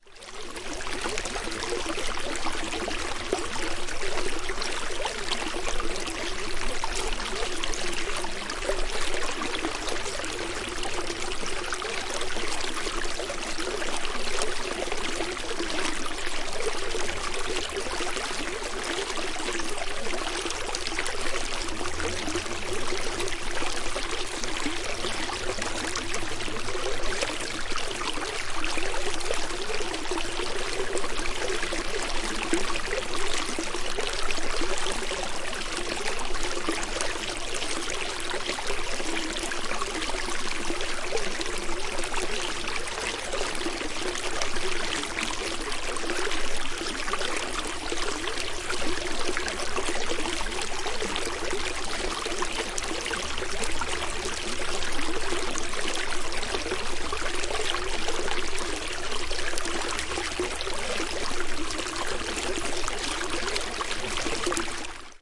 Little Babbling Brook
Sennheiser MKH 8040/MKH 30 MS in a Cinela Pianissimo windshield into a Sound Devices 744T; limiters on, no HPF. Stereo-linked 50-50 blend. Normalized to 0dBFS with no processing of any kind.
This sound effect acts as a demonstration of this stereo pair mic combination right out of the box.
I live less than a mile from a major interstate, so there is a bit of low end rumble that will need to be removed when using this sound effect.
Brook, Suburban, Field-Recording